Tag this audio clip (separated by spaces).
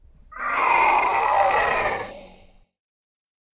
groggy Monster short